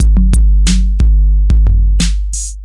90 tr808 phat drums 01
phat 808 drums
beats, drums, phat, free, 808, hiphop, roland